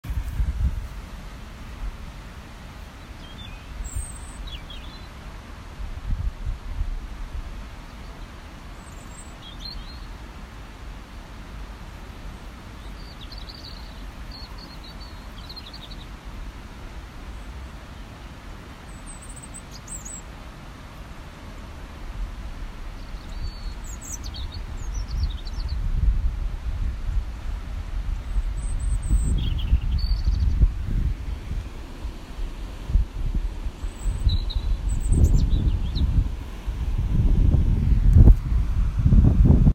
Birds singing in a tree by a streM